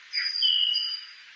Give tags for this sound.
nature; field-recording; blackbird; processed; bird

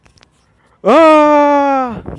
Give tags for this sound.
talk; voice

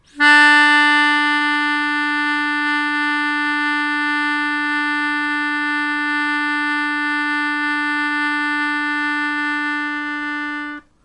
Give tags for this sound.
low; note; d; melodica

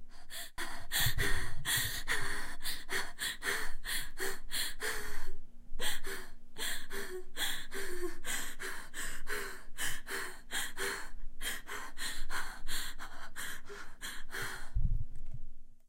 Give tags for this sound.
vocal; voice; female; girl; scared; request

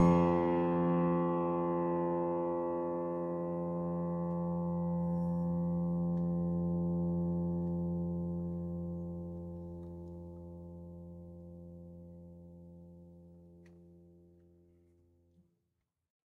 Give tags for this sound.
piano; fingered; strings

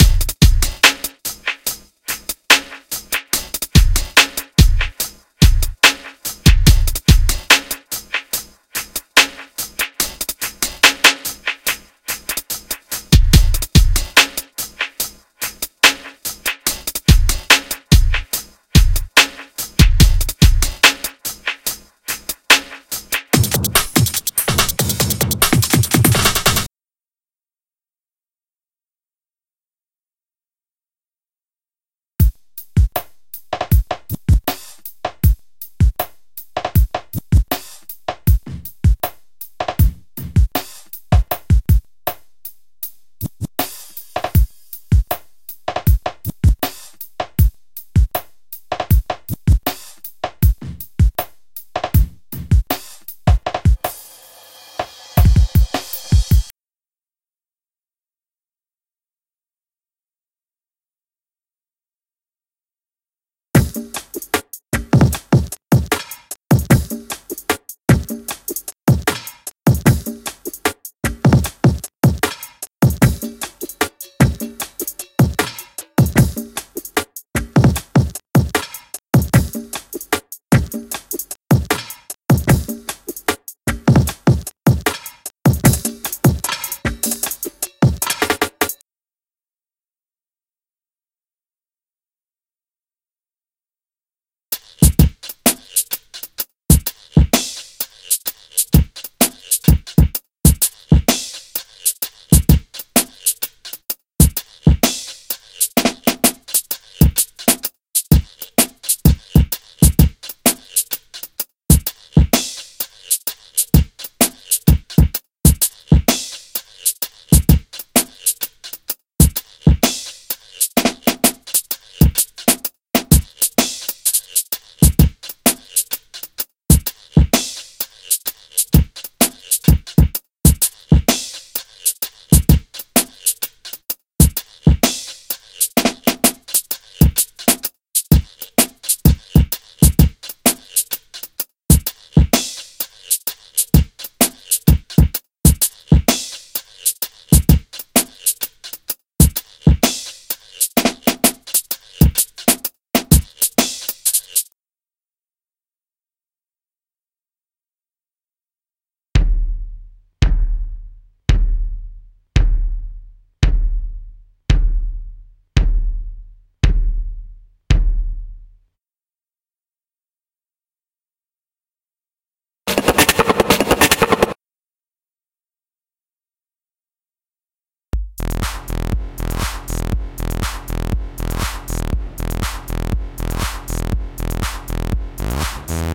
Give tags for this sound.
Traxis; Blues; Dub; Bass; Country; Rock; Beats; BPM; Backing; Hip; Hop; Music; Free; Guitar; Dubstep; drums; Synth; Loops; Keyboards; House; Jam; EDM; Techno; Rap

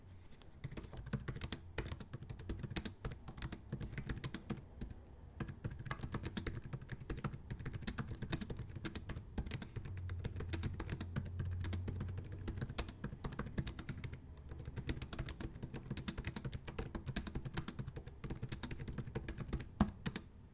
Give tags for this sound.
Computer; H2; Keyboard

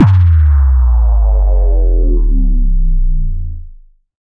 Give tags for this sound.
bass; fx; sweep

